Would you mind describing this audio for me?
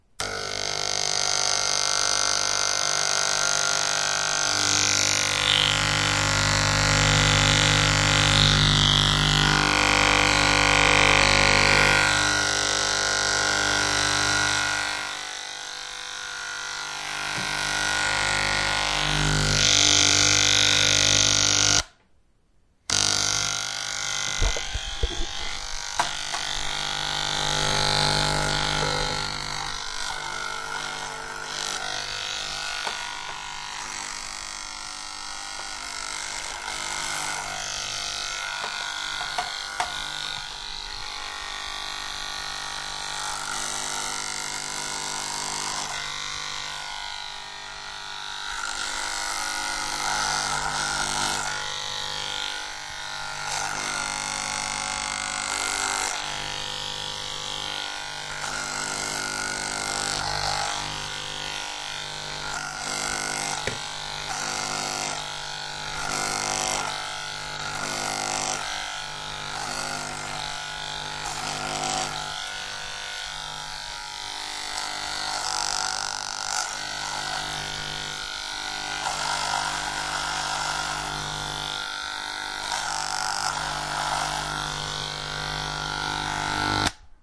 shave head
Yesterday I cut my hair and recorded it partially. First I turn the hair cutting electronic device on and let it sing to the recorder, then I cut some of my hair with it. Bzzzwrrr! This recording was made with a Zoom H2.
device, shave, hair-scissors, zoom-h2, hair-shears, scissors, hair-cut, hair-cutting-machine, h2, electric, electric-device